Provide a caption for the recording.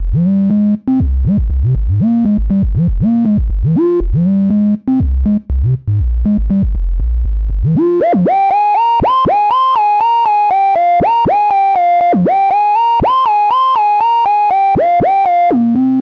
These loops are all with scorpiofunker bass synthesiser and they work well together. They are each 8 bars in length, 120bpm. Some sound a bit retro, almost like a game and some are fat and dirty!
These loops are used in another pack called "thepact" accompanied by a piano, but i thought it would be more useful to people if they wanted the bass only.
electro, bass, synthesiser, synth, retro, dub, gaming, riff, loop, 120bpm